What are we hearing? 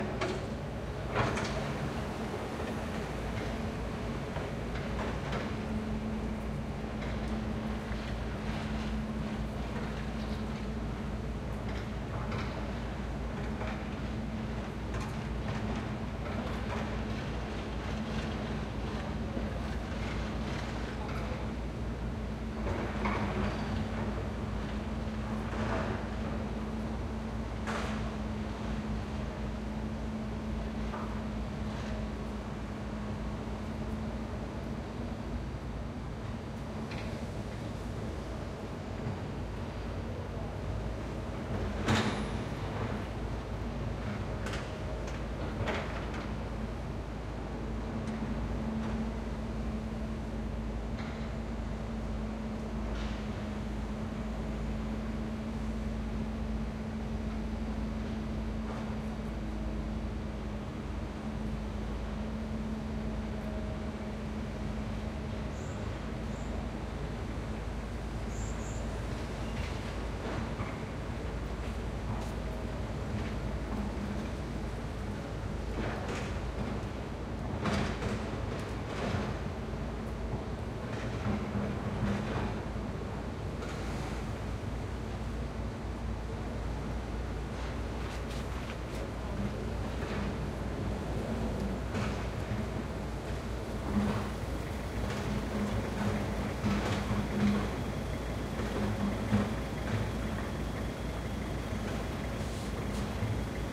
INDUSTRY CONSTRUCTION SITE 01
Construction site activity.